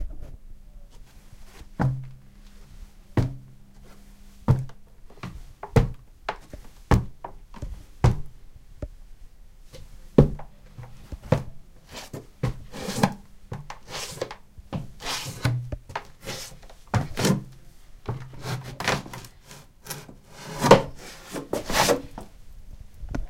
the sound of feel scraping the stairs of a house in london